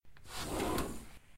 Sliding door opening
door, open, sliding